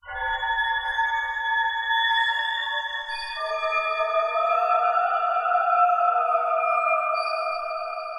This is my first noise I've ever uploaded, so any tips to improve this or any future sounds are welcome. The original sound came from a subway car screeching to a halt and it was edited with Audacity and Adobe Premiere. Enjoy!